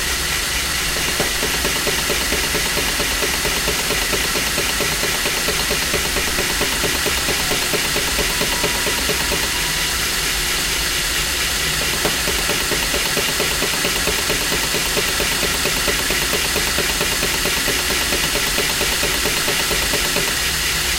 Toothing Machine
A machine punching tooth spaces in a band saw with a crank punch while the band coil is advancing. There is a pause where no punches are made. The machine is punching hundreds of teeth per minute.
Recorded with a Rode NT4 microphone and the Edirol R44 recorder.
automatic, factory, machine, punch, saw-tooth